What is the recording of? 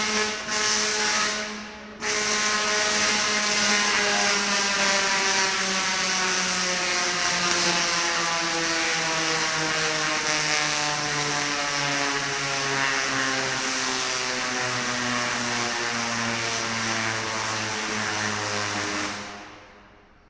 Tesla descending-m
Large Tesla coil fired off in a very large old factory. Probably around 40KW.
It is unusual to hear a Tesla coil of this size in an indoor space.